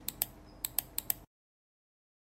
Sonido de los "clicks" de un mouse
button, click, mouse